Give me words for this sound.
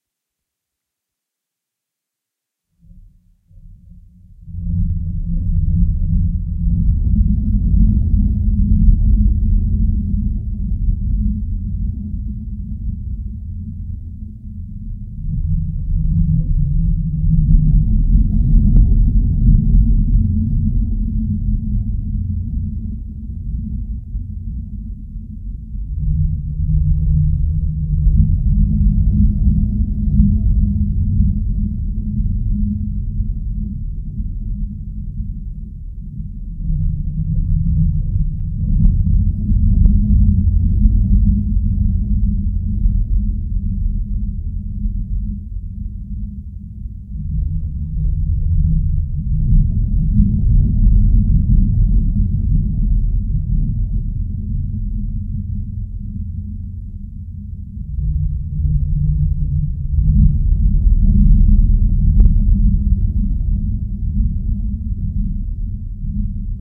Following the Events
Triple OSC transformed notes with echo effect.
Mixed in Audacity.
ambient, wave, events, evil, darkwave, scorn, bass, fx, raw, following, ambiance, dusk, effect, osc, cavern, cavernous, modulate, odds, darkness, glitch, dark, sorrow, dull